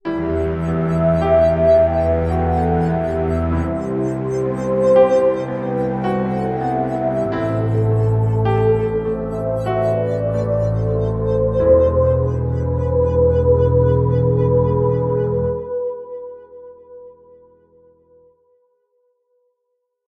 Orchestral intro 2
Slow 50 bpm, Emin/maj, 3/4 timing, piano and 3 synth parts, orchestral feel, resolving to major, recorded on iOS GarageBand
trailer, cinema, movie, intro